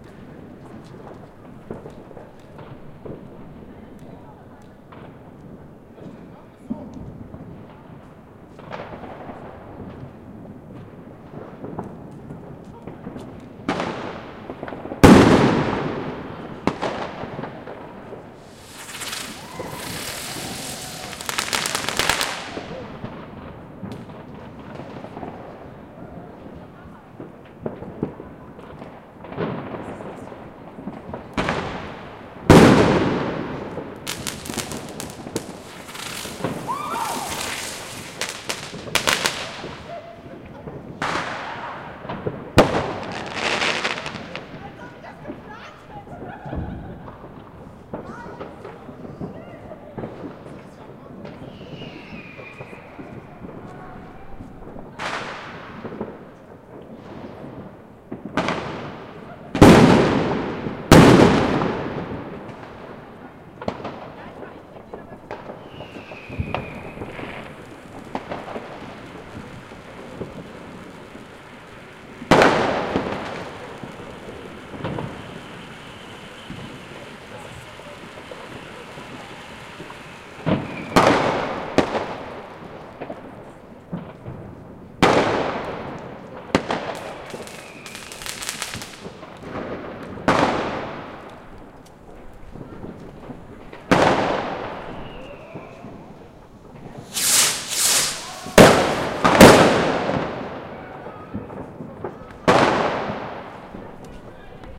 outdoors, new years eve at midnight: church bells, fireworks, people